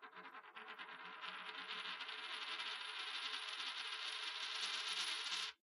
coin or money spinning on a wooden or plastic table
coin, money